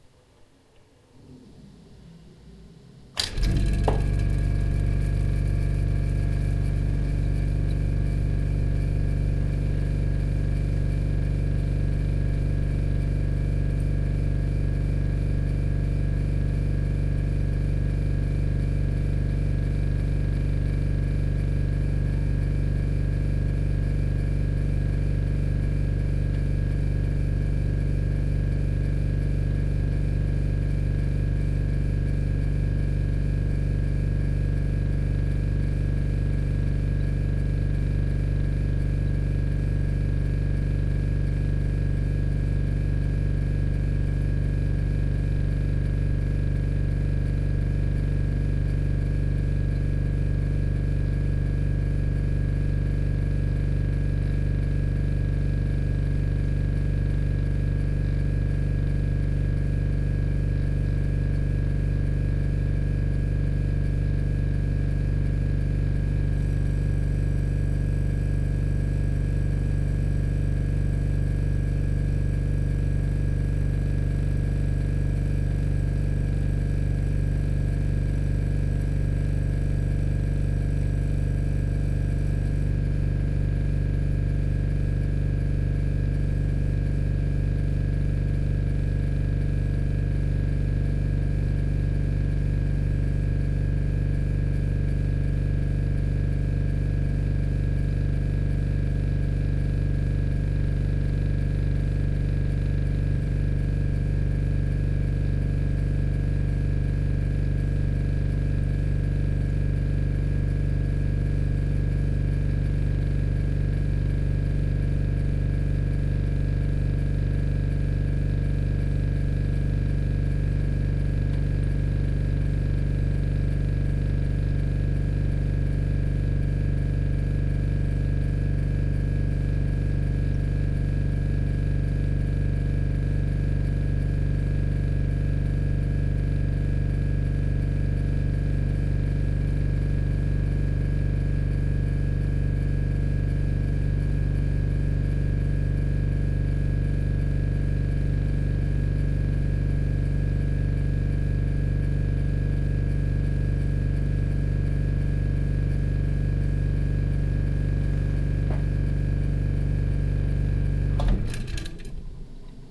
Refreg Start - long -stop
An old refrigerator starts, working and stops.